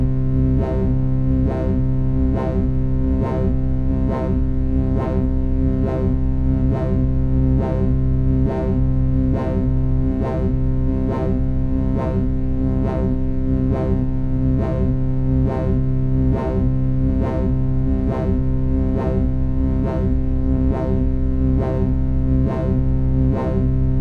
80bpmloops8bars14arp
Synthloop 80 bpm, experimental, strange, uncut and analouge.
Arpigo, Experimental, Synth, Synthloop